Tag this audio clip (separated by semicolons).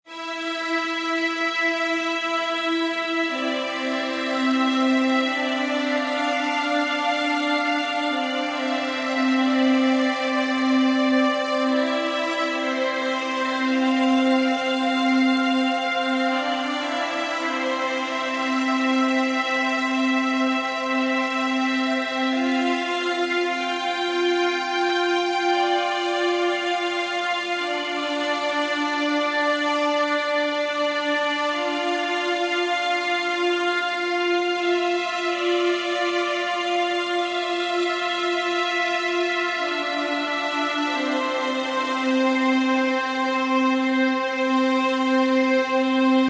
breakdown; adadgio; trance; strings